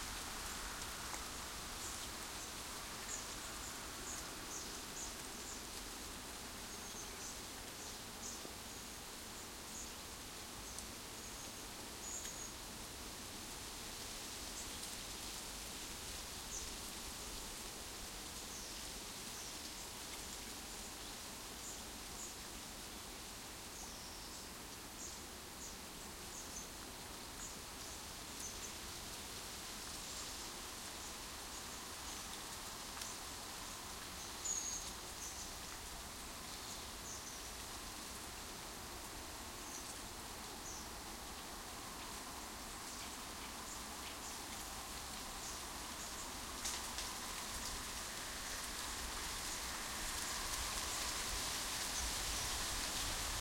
Tree Rustle 4
Very windy day with dry leaves rustling in a tree by the river Cher, in Bruere Allichamps, France. Thick and luscious
Microphones: 2 x DPA 4060 in Stereo
Rustling, Field-Recording, Windy, Trees, Leaves